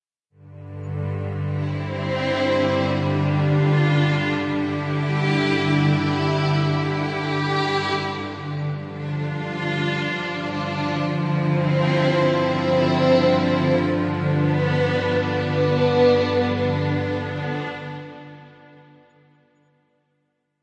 cinematic vio4
made with vst instruments by Hörspiel-Werkstatt Bad Hersfeld
ambience, ambient, atmosphere, background, background-sound, cinematic, dark, deep, drama, dramatic, drone, film, hollywood, horror, mood, movie, music, pad, scary, sci-fi, soundscape, space, spooky, suspense, thrill, thriller, trailer